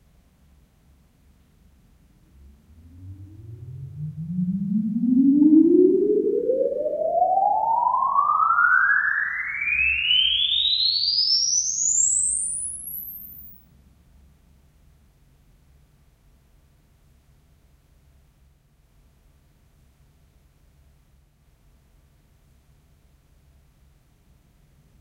Stairwell 1 (MS Stereo)
Impulse Response of a large concrete stairwell in an industrial shopping center.
You can deconvolve all these recordings with the original sweep file in this pack.
Happy Deconvolving!
Impulse-response, IR, IR-Sweep, Stairwell